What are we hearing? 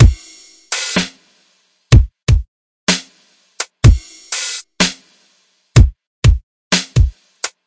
beat with snare 4 4 125bpm blobby type kick fizzy hats 3456-3468
beat with snare 4 4 125bpm blobby type kick fizzy hats